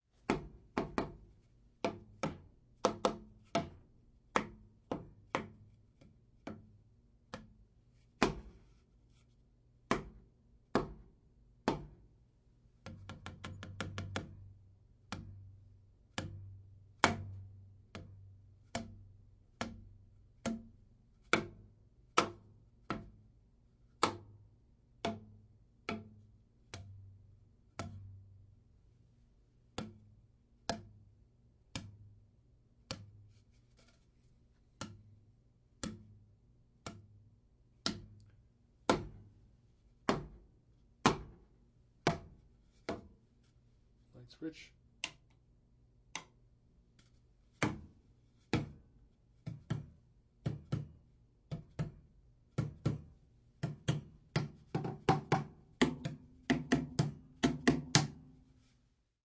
Hitting wooden beams with a cardboard tube.